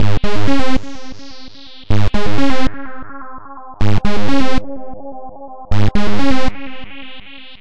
This is an acid bass type lead created with Jeskola Buzz instrument ksynth, with added OhmBoys Delay LFO at 126 bpm.